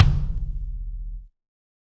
Kick Of God Wet 014
god, drumset